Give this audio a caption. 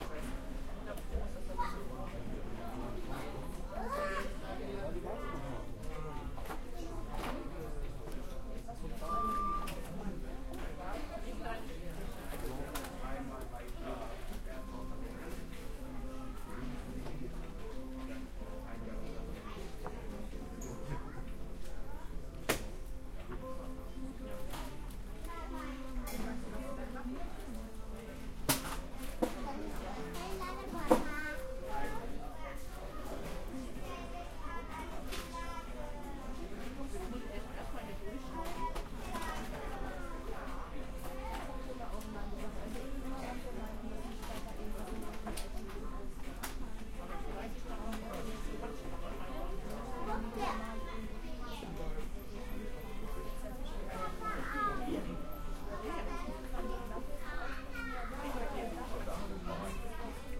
Crowded restaurant with a lot of families